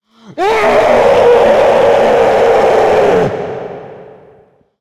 Monster Roar 02
I edited a man's scream into a monster roar
Creature, Growl, Monster, Roar, Scream